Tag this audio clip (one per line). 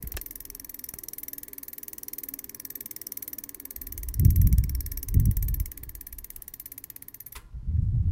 bike
pedal
freewheel
bicycle